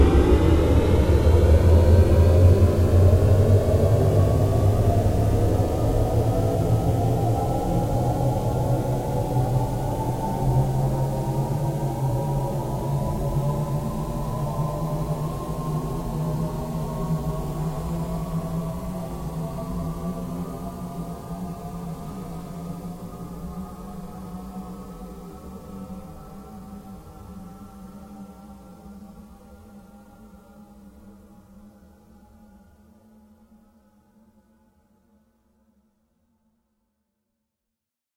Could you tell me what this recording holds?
A sound for a future car or a hoovering spaceship. Speeding up linear.
Stretched record - also louder.